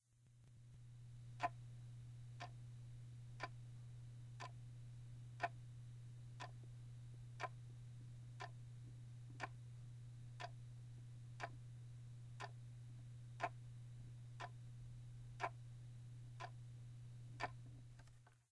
18 seconds sample of the sound of a clock!